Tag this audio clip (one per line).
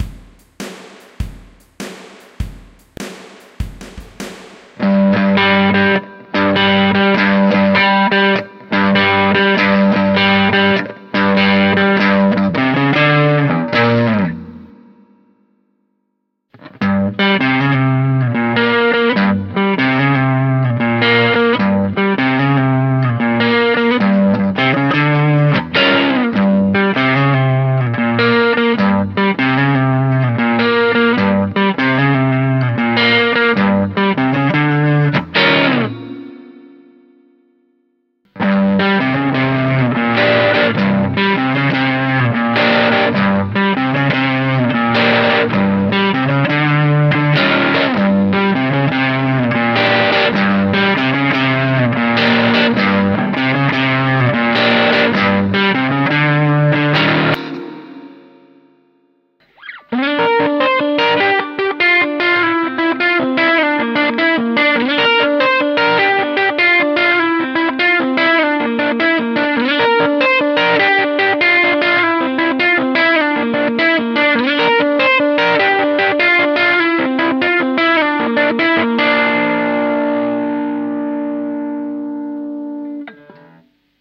loop
blues
LesPaul
sample